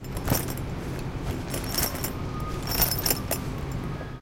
This is the sound produced by the movement of a H2 recorder bag.
Ambience sound and sounds from movement with a little bag is perceived.
recorder, UPF-CS12